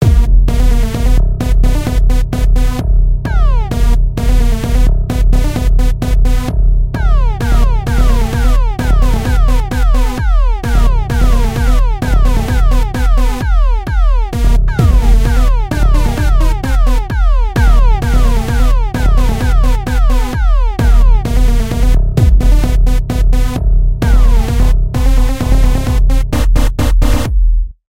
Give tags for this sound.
game music techno

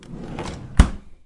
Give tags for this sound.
closing ice-box opening

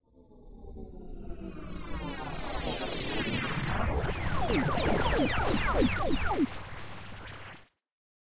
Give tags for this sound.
Spaceship Attack Warp Space Phaser

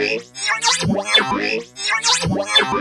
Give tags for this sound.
abstract analog beep bleep cartoon comedy electro filter fx game happy-new-ears lol loop ridicule sonokids-omni sound-effect soundesign strange synth synthesizer weird

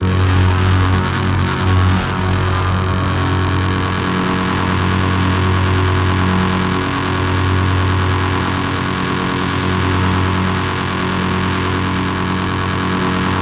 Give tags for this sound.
Texture Ringmod Synth Multisample

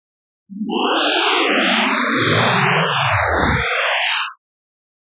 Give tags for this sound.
space ambient synth

